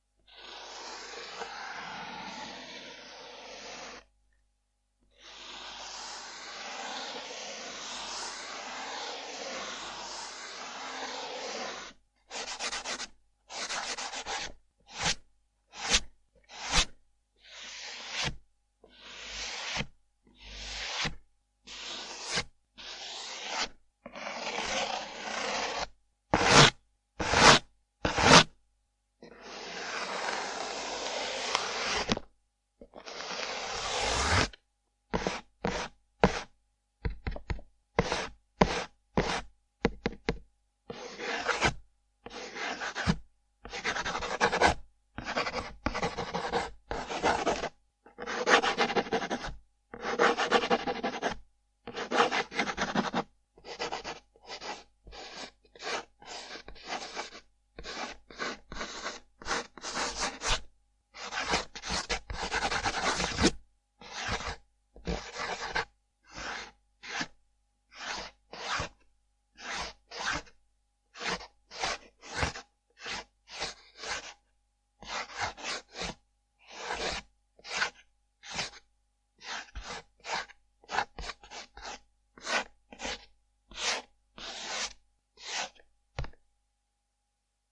Surface friction 2
friction, motion, paper, Surface